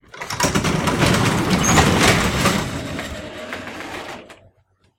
Door-Garage Door-Open-04
This is the sound of a typical garbage door being opened.
Door, Garage, Large, Metal, Open